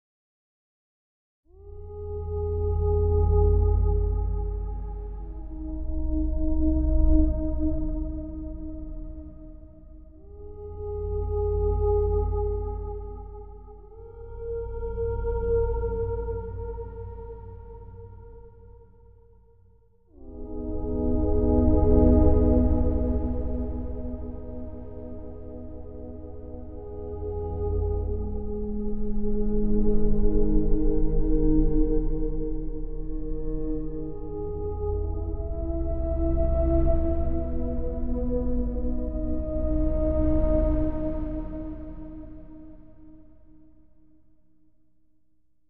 A tense combination of synthesised choral and orchestral sounds.